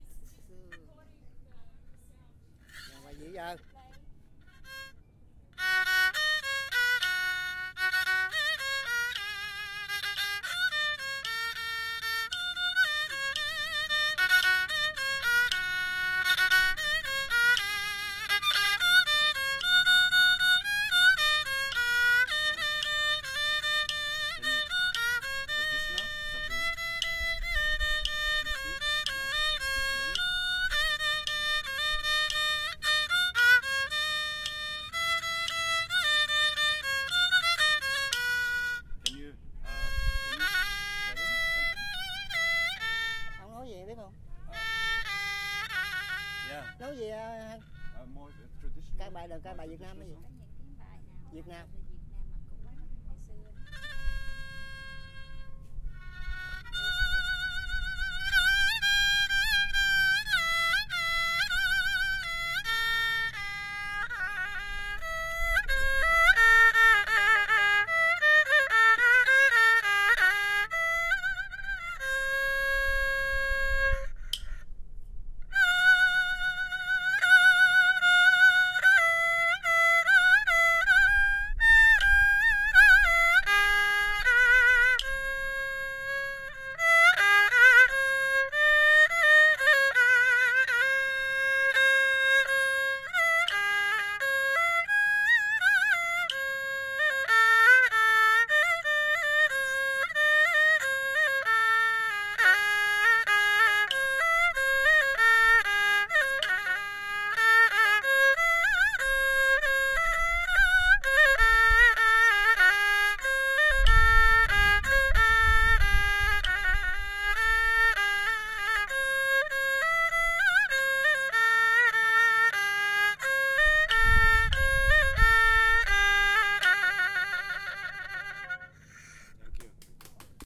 Recording of some traditional vietnamese Intruments
instrument, vietnam, strings, violin